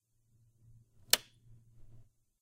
switchon-button
The sound of a switch.